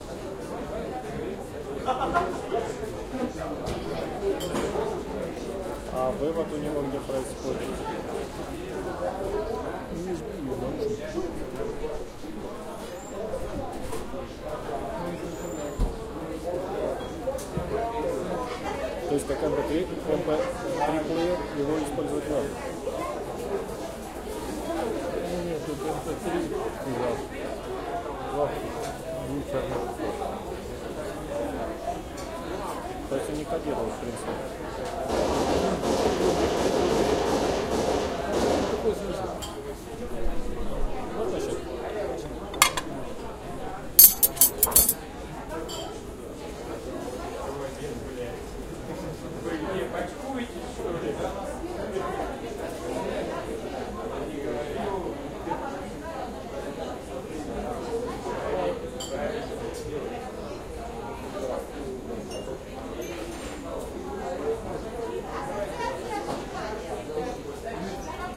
pub Vegas2
Atmosphere in the beer restaurant "Vegas" in the Omsk, West Siberia, Russia.
People drink and chatting and having fun, clinking glasses, dishes...
In the middle - clatter of plates and then ringing forks.
Recorded: 2012-11-16.
AB-stereo